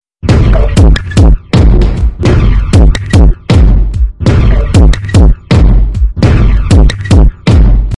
Various bleeps and bloops recorded and processed in Cubase forming a rythm pattern.Enjoy !!!
beat, drum